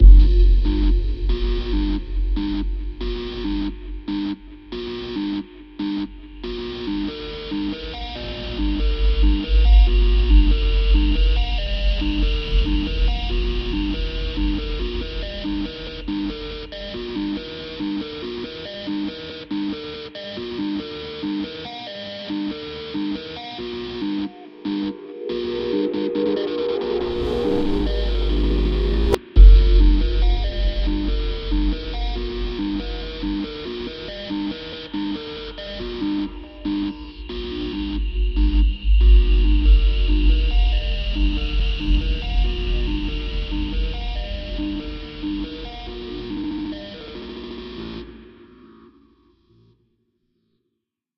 SpySynth 2 - (140bpm)
This sound or sounds was created through the help of VST's, time shifting, parametric EQ, cutting, sampling, layering and many other methods of sound manipulation.
Ambiance, Ambient, Atmosphere, Bass, Cinematic, commercial, Drone, Drums, Loop, Looping, Pad, Piano, Sound-Design, Synth